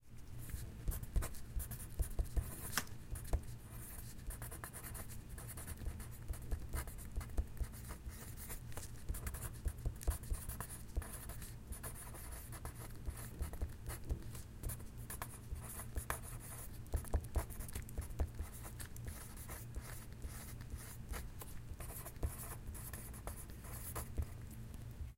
Pencil On Paper
Field-Recording, University